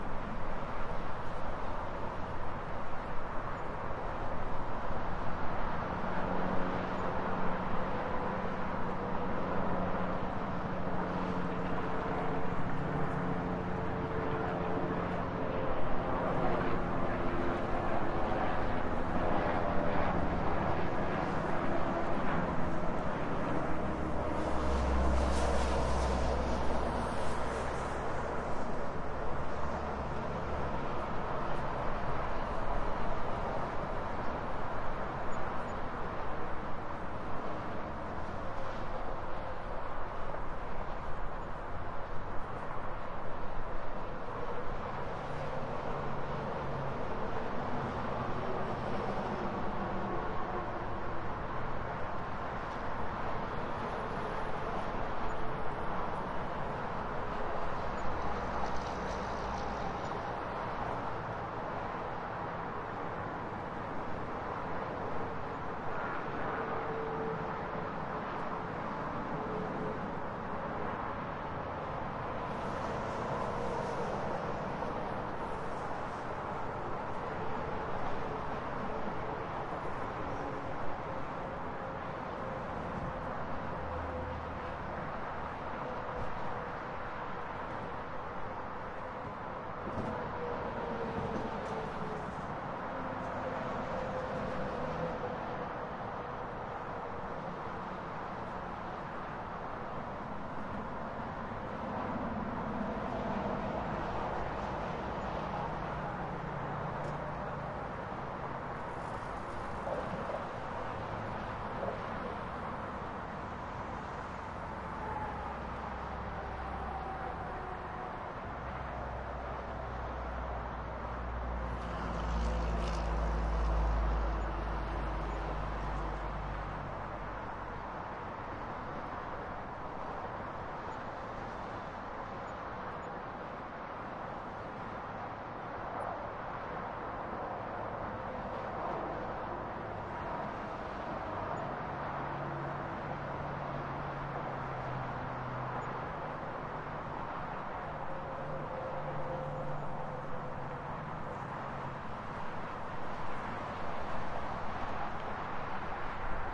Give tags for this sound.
ambience; highway; motel

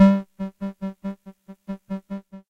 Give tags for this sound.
vintage; vl-1; casio; wobble; adsr; vl-tone; synth

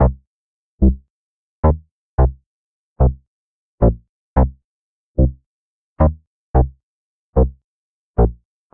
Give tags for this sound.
110bpm; bass; loop; mislabelled; stab

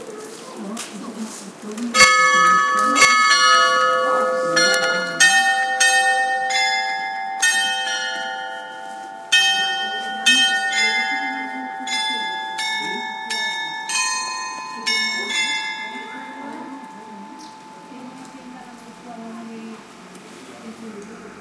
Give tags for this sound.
bell; chimes; field-recording; rioja; spain

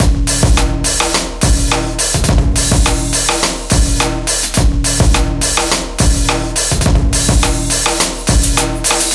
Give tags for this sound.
bpm; Hip; 105; Hop; Drums; Beat

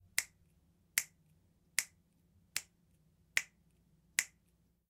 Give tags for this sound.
truk; Chasquido

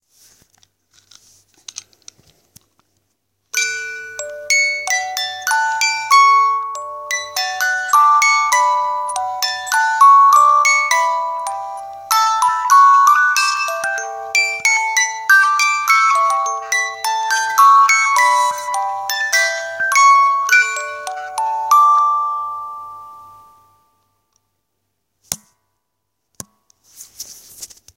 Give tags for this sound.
hand-operated; wind-up; box; La-vie-en-rose; historical; musical-box; music-box; music

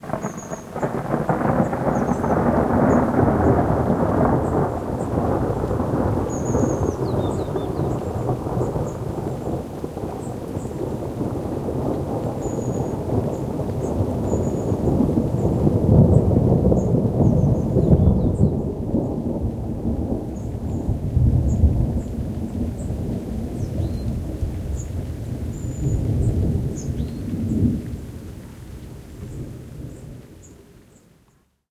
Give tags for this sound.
Weather; Thunderstorm; Storm; Thunder; Lightning

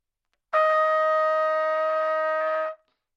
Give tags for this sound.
Dsharp4; good-sounds; multisample; neumann-U87; single-note; trumpet

